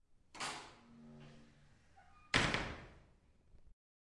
Door Close
The door of the restroom is being closed by a student. It is a manually-produced wooden sound. It has been recorded with the Zoom Handy Recorder H2 in the restroom of the Tallers building in the Pompeu Fabra University, Barcelona. Edited with Audacity by adding a fade-in and a fade-out.
tallers, university, bathroom, open, door, close, zoomH2handy, UPF-CS14, campus-upf